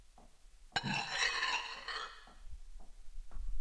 deslizamiento de una pieza en el suelo